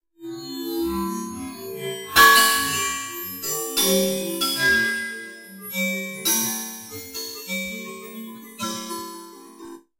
Granulated and comb filtered metallic hit
comb, grain